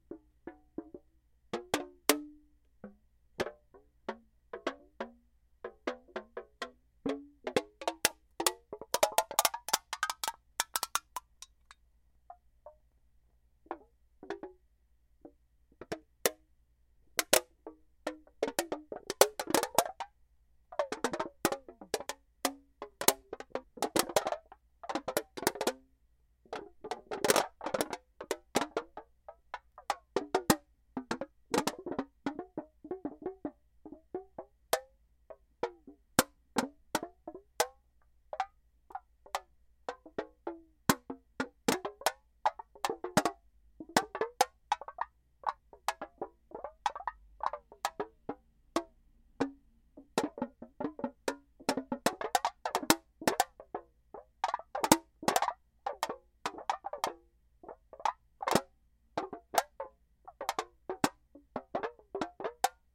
A tin aluminiumcover on a container makes noise when being pushed by air in a sealed container
noise, sealed-container, aluminium